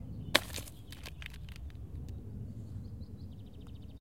Sound taken during the international youth project "Let's go urban". All the sounds were recorded using a Zoom Q3 in the abandoned hangars U.S. base army in Hanh, Germany.
ambient, dark, deep, drone, effect, experimental, fx, germany, hangar, pad, recording, reverb, sampled, sound-design, soundscape, zoomq3